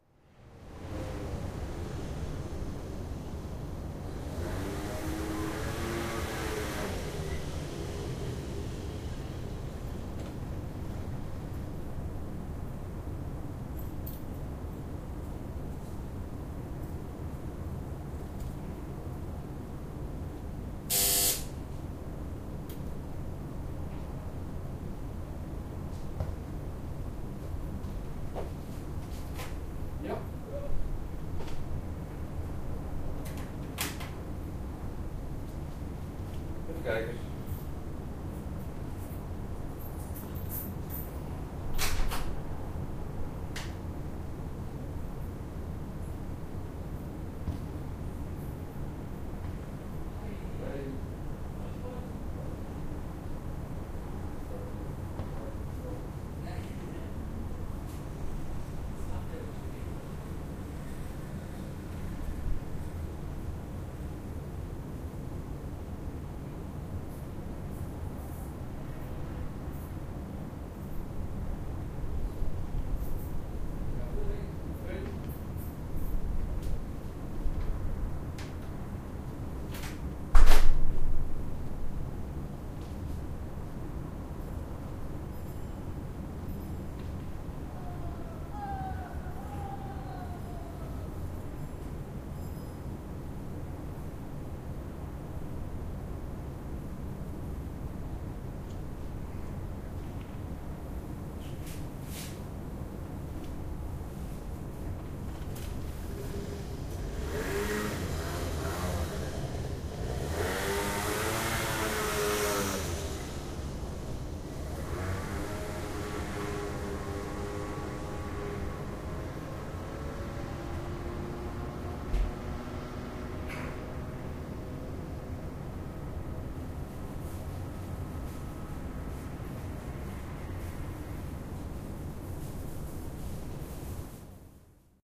As a background you hear some urban noise and the pumping station located just behind the apartment-building that I live in. There they keep the pressure on our drinking-water. A scooter approaches and holds still in front of the building. It's the pizza-boy and he's going to deliver my Lasagna. I ordered it because I was hungry but also because then I knew that this typical sound would appear and there would be enough time to get my Edirol-R09 in it's right position to record this sound. You hear that most irritating doorbell of mine. I open the door and wait till the pizza-boy has reached my door. He hands me the Lasagna, me him the cash. I get back into enter my apartment, closing the door, meanwhile he's leaving on his scooter, fading away in the distance.